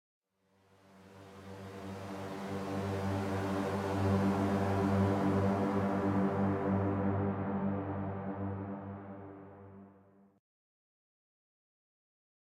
techno fx pad atmospheres ambiences noise dark